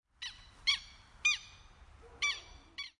This audio represents the sound of a bird.